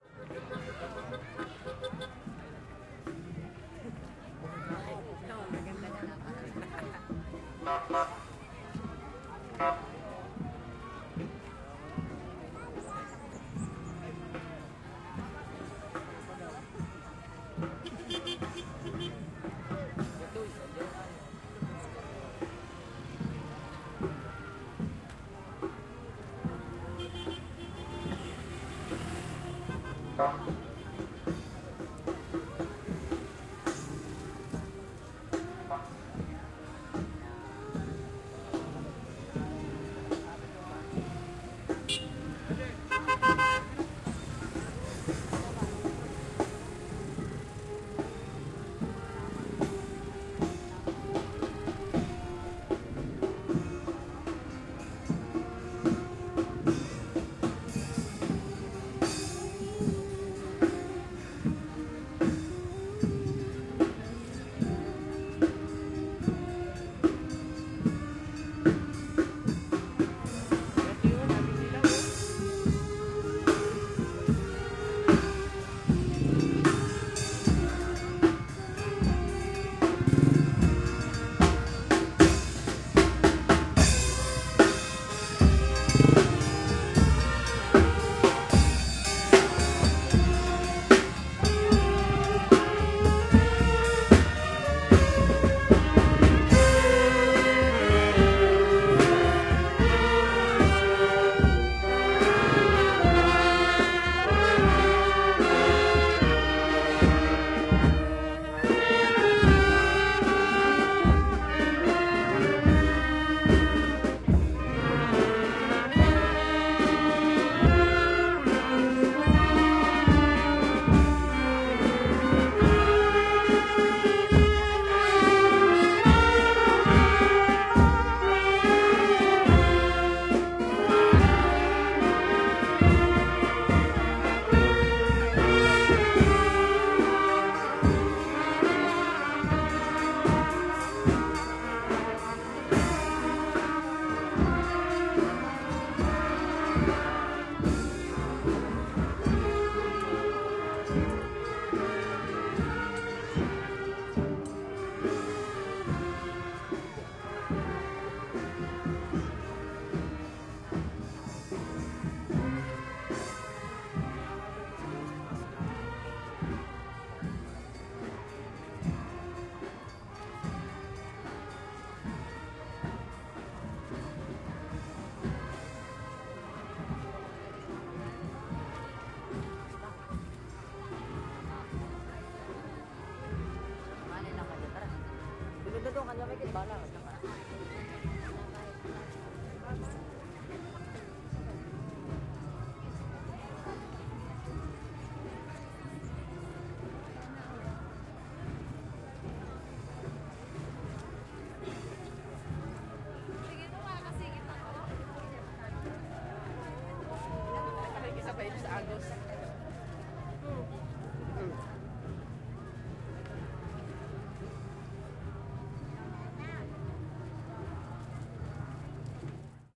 LS 33506 PH Parade
Parade (Calapan city, Philippines).
I recorded this audio file in the evening of January 1st of 2017, in Calapan city (Oriental Mindoro, Philippines). In the street, a parade held in honour of Santo Nino (Jesus Crist) was passing by. You can hear the band playing music while walking ahead of a statue of Santo Nino perched on a truck, and the ambience in the surrounding (people talking, vehicles, etc…)
Recorded with an Olympus LS-3 (internal microphones, TRESMIC ON).
Fade in/out and high pass filter 160Hz -6dB/oct applied in Audacity.
ambience, band, crowd, drums, field-recording, horn, instruments, music, new-year, parade, people, Philippines, street, trumpets, vehicles, voices